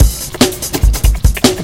a field recording of myself beatboxing with a waterfall in the background layered with a breakbeat. fast version.